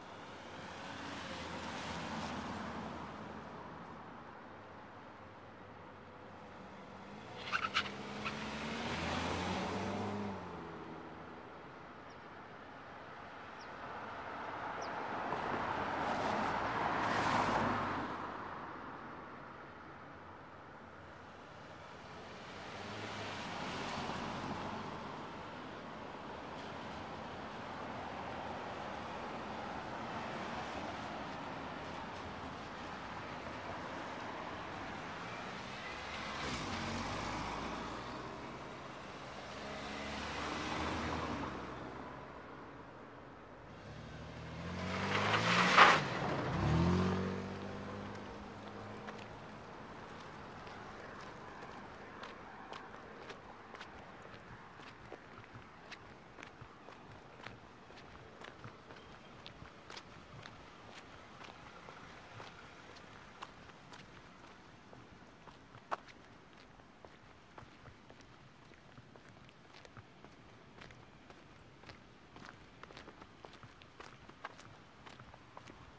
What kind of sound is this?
Cars Turning Right : Wet : Intersection
Car by wet interesection
Wet, Footsteps